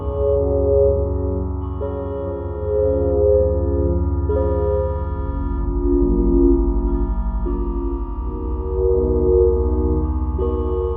Some chords played on a weird synth patch I made with the Thor synthesizer. Kind of somber or something else.
glitch, digital, relaxing, synthesizer, weird, moody, ambient